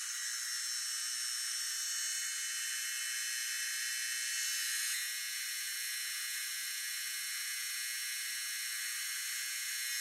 Fluorescent bulb sound
A closeup recording of a bright light like the ones that illuminate flags. Recorded with an iphone for intentionally cheap-sounding, distant fidelity. Currently mixed hot but can be brought down for a more ambient layer in a room.